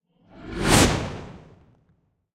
simple whoosh 002
transition, Whoosh